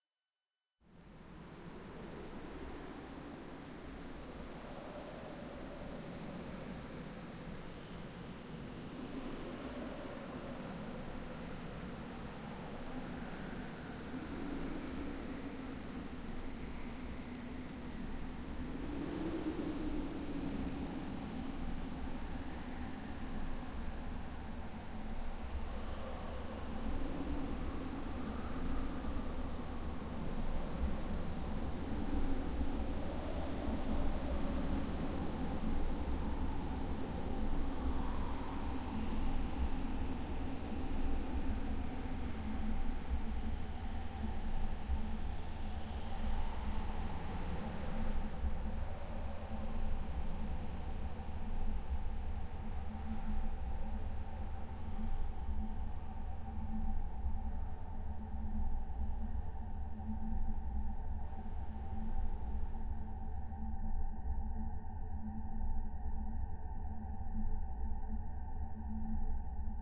Desert Approach
This version adds the approach and entering of something large mechanical and alien in the desert.
grainy, windy, world, synthetic, desert, alien